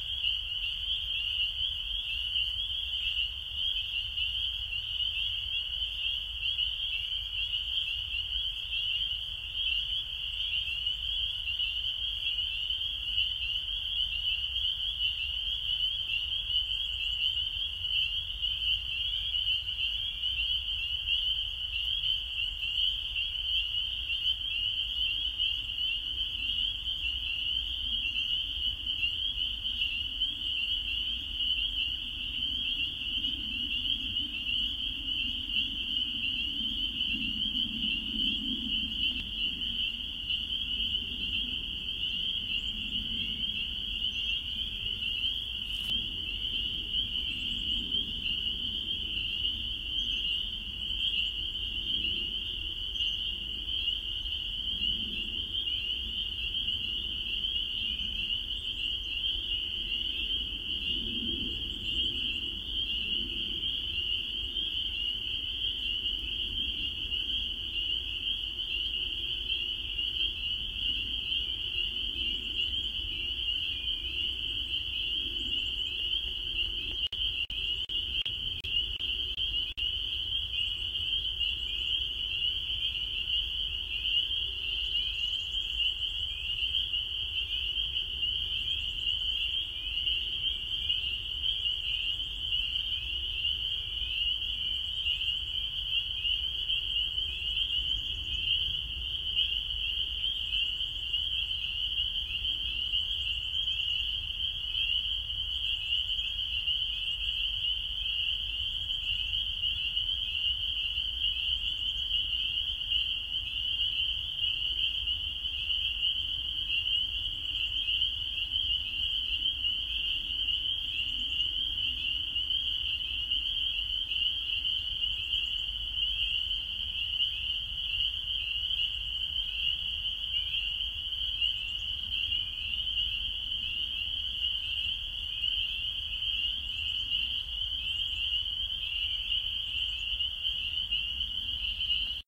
Sound of the spring peepers in March
field-recording, marshes, nature, new-england, small-town, swamp, frogs, ambience, spring, spring-peepers